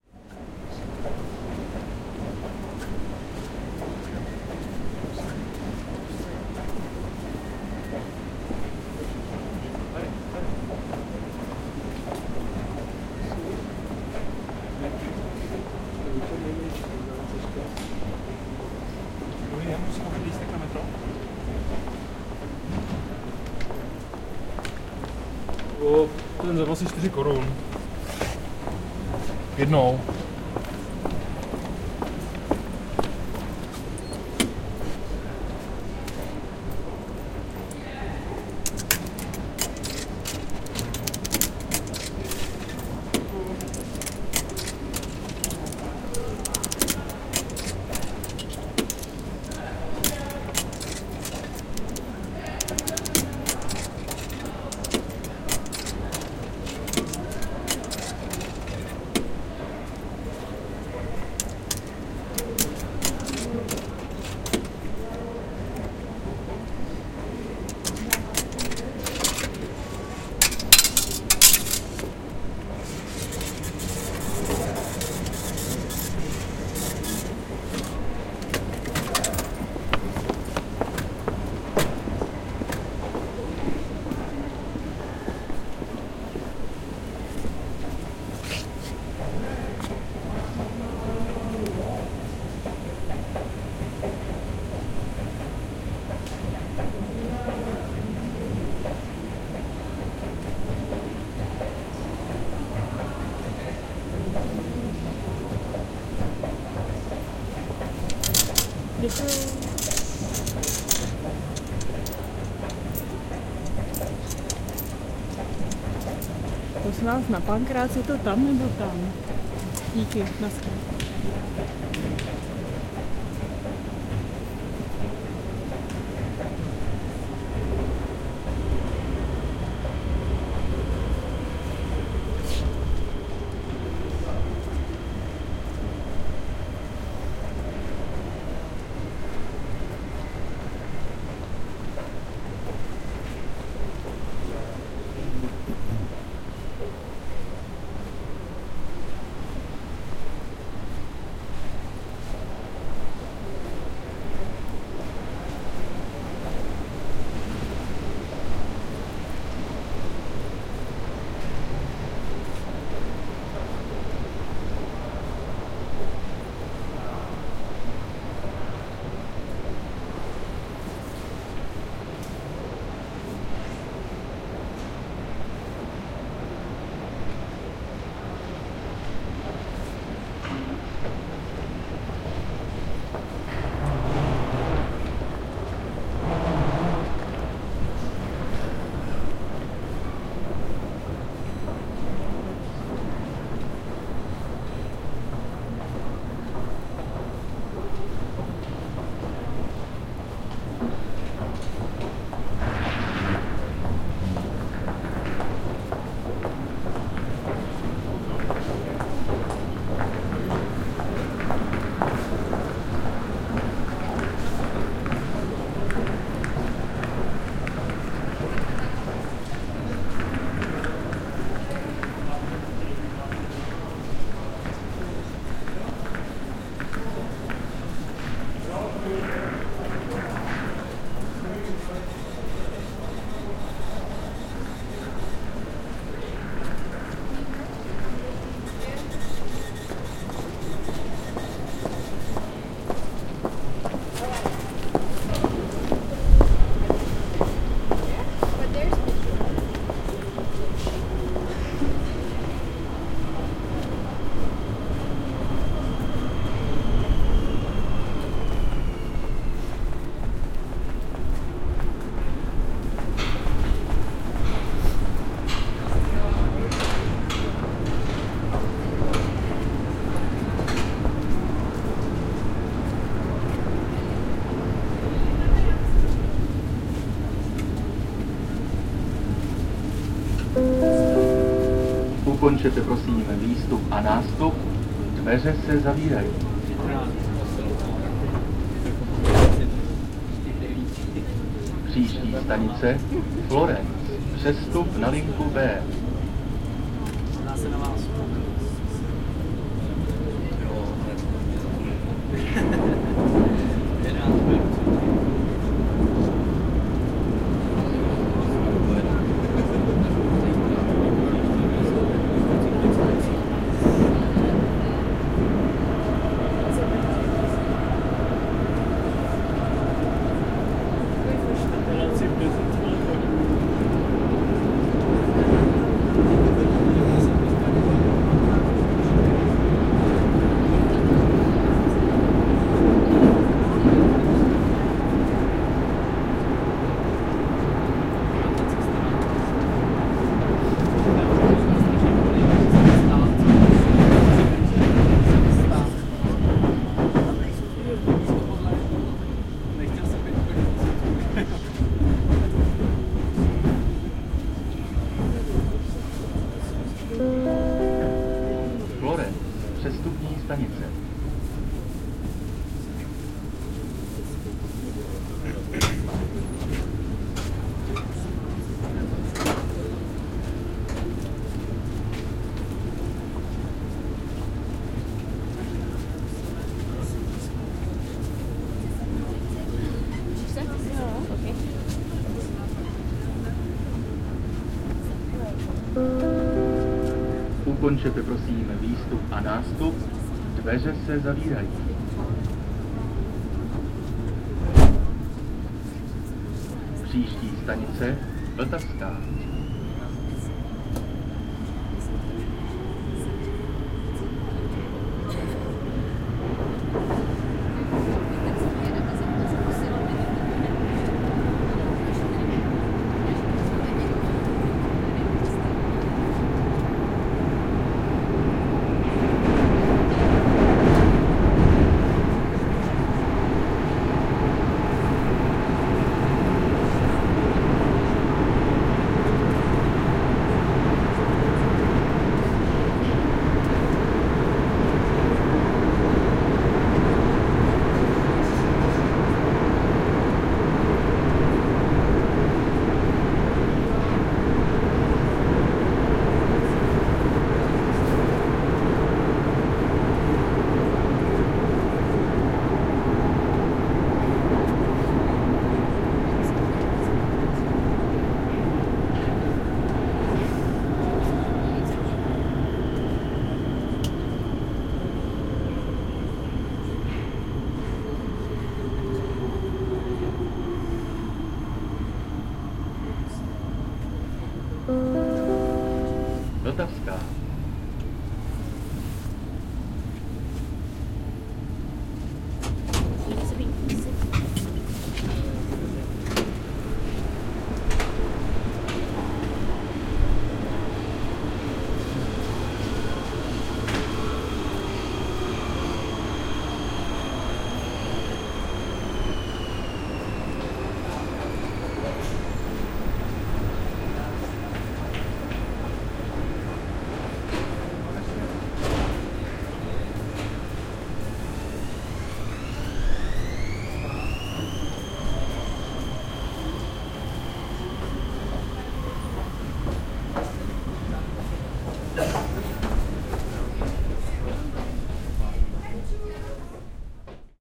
Subway of Prague
One Autumnal Evening in the Prague subway from Hlavni nadrazi (Main Railway Station) to Vltavska station.
2016, ambiance, automat, casa-da-m, city, czech, field-recording, metro, money, people, Prague, subway, tickets, voice